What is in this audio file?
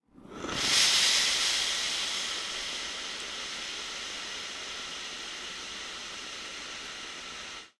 Steam iron producing steam sound